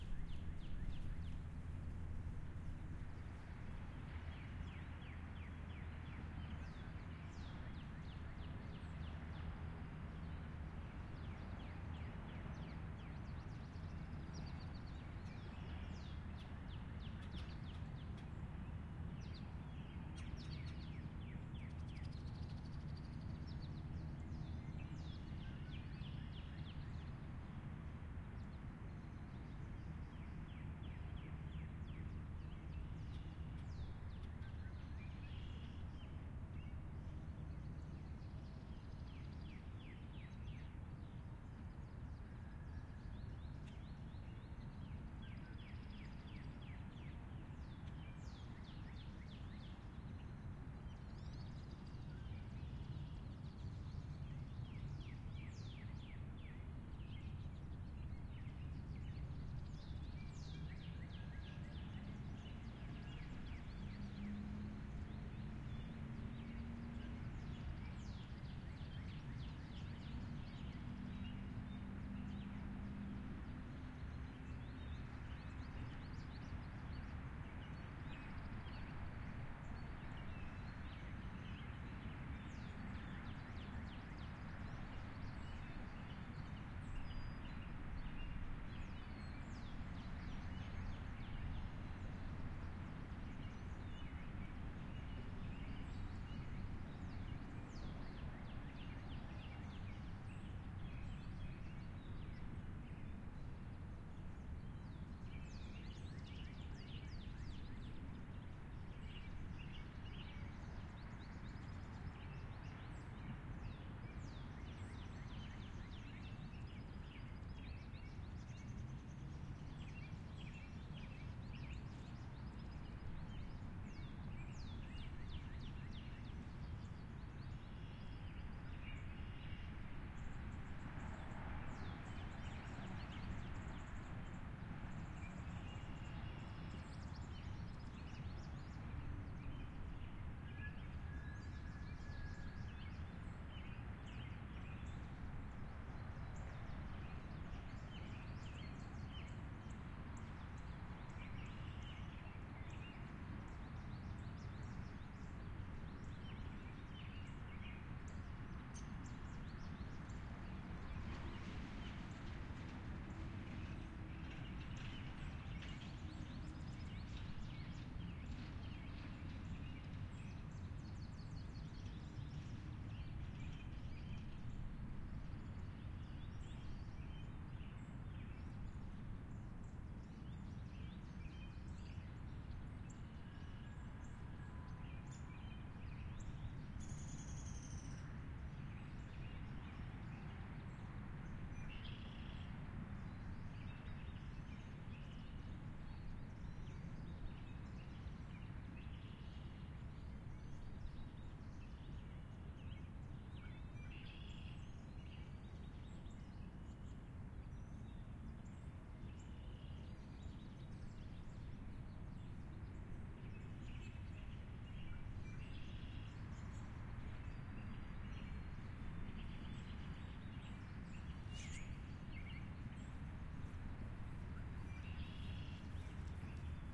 Arboretum Park Bench
free use
park field recording H6 Zoom stereo
ambient, bench, field, park, recording, trees